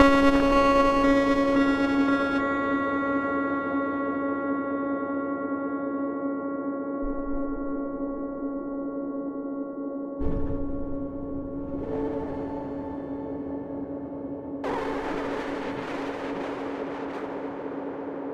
Dflat augment
Another shimmering drone-like loop
disturbed, drone, loop, soft